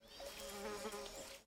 Another fly in Tanzania recorded on DAT (Tascam DAP-1) with a Sennheiser ME66 by G de Courtivron.
africa, fly, tanzania